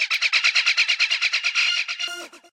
its a short disco fx sample